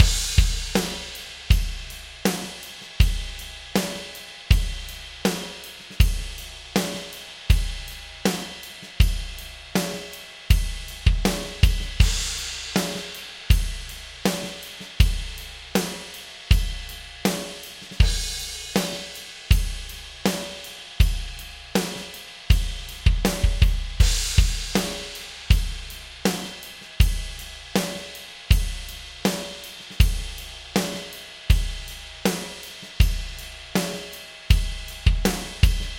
Song1 DRUMS Fa 4:4 80bpms
80, Drums, rythm, loop, blues, Fa, Chord, bpm, beat, HearHear